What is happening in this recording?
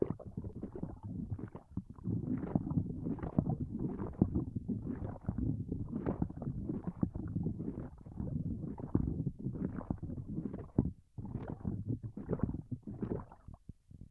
hollowed out pumpkin, filled with water, stirring with large spoon; recorded with a Zoom H2 to Mac/HD